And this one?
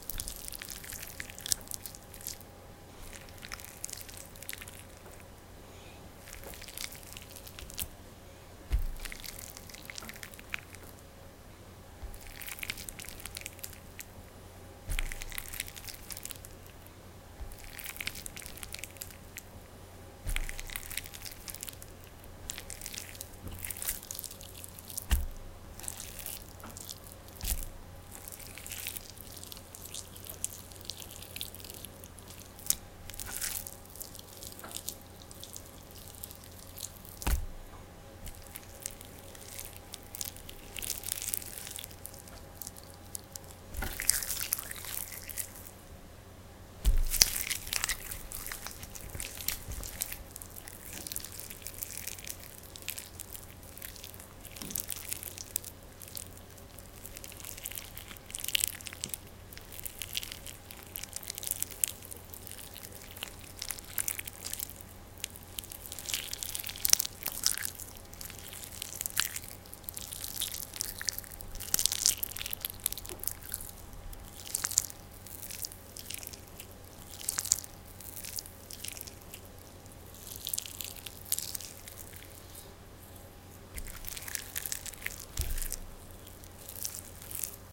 Slimy flesh
The sound of kneading a piece of raw meat in my hand. It was gross, but I like the results :)